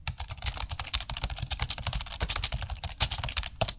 Keyboard typing
Typing on a computer keyboard
computer, keyboard, keystroke, laptop, office, type, typewriter, typing